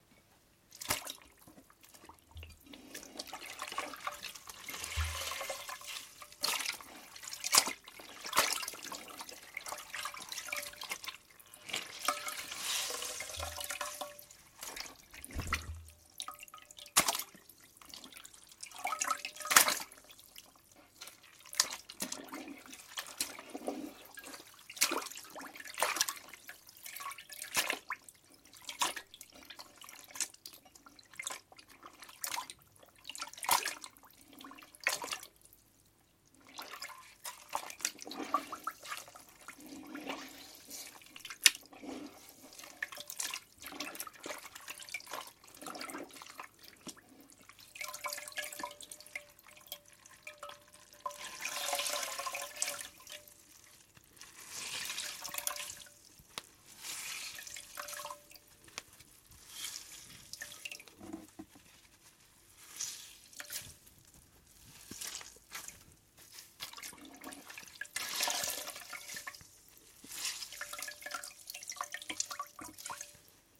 wet cloth
Set of water sounds we made for our sound library in our studio in Chiang Mai, North Thailand. We are called Digital Mixes! Hope these are useful. If you want a quality 5.1 or 2.1 professional mix for your film get in contact! Save some money, come to Thailand!
drops, splash, glug, pour, pee, drink, sponge, drips, dripping, tap, liquid, alex-boyesen, gurgle, gargle, drain, wet-cloth, drip, sink, bubble, water, watery, urinate, ed-sheffield, trickle